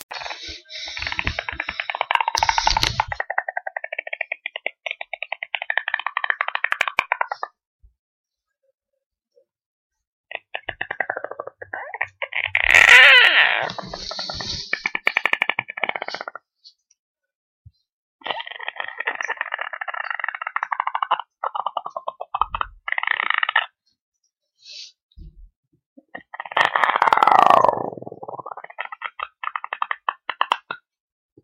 Creepy Noise
I strange sound I can make when sucking in air in a thin manner.